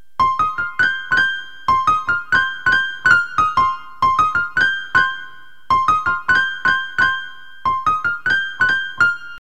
Piano Jazz Fill
jazz, music, piano